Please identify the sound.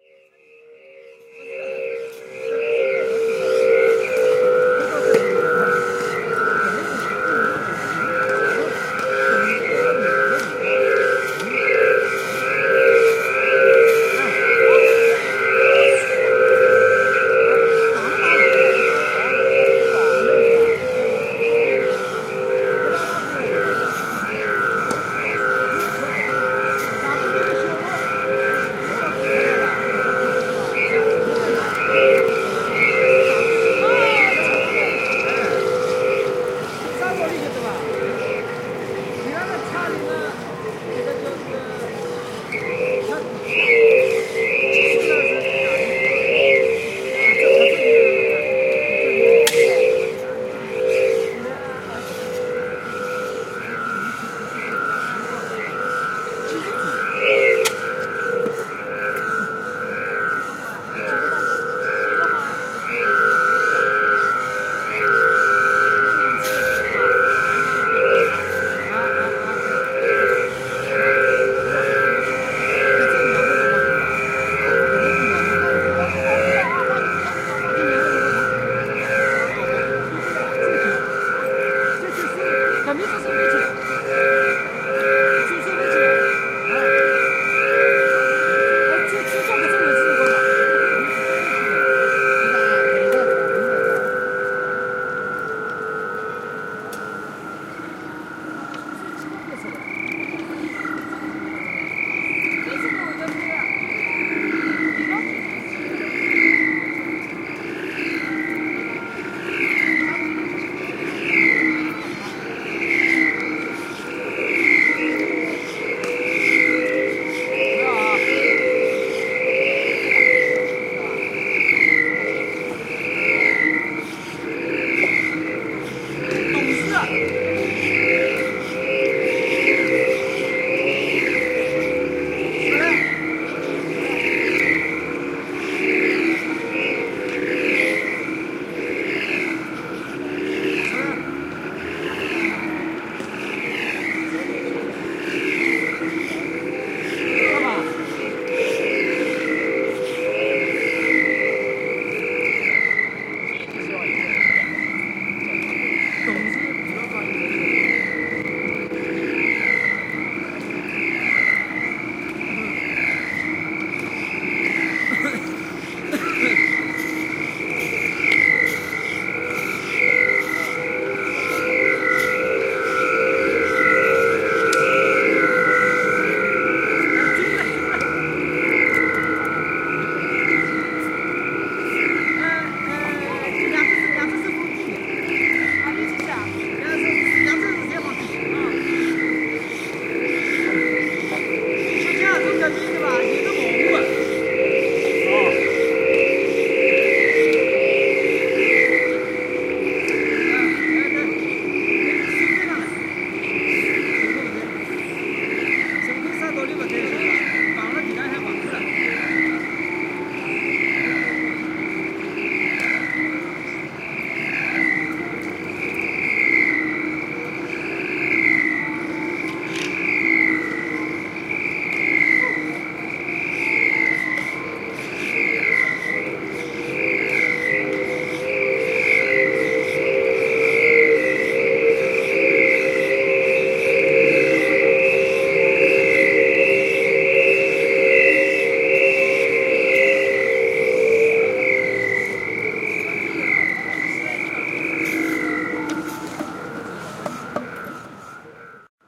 Rope-spun flying tops recorded in Lu Xun Park, Shanghai China, 2010
china, chinese, field-recording, fly, flying, game, gyrate, lu-xun, park, play, rope, shanghai, spin, spinning, spinning-top, spun, top, twirl, whirl